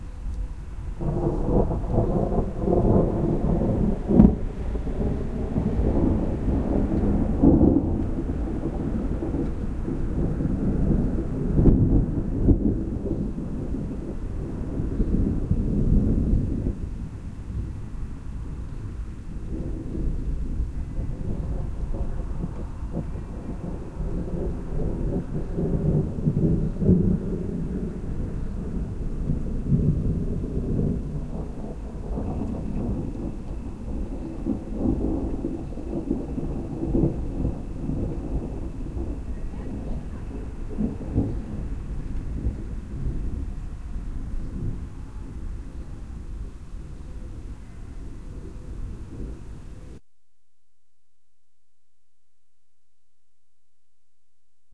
Binaural recording of thunder rolling around hills. Panasonic mics to MD walkman.
binaural, field-recording, thunder, unprocessed